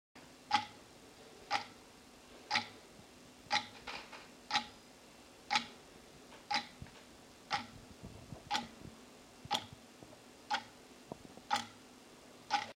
Just a normal clock ticking. I created this sound for a radio report about the change from winter to summer time. For recording I used a simple Zoom recorder.
Uhr, Ticking, Clock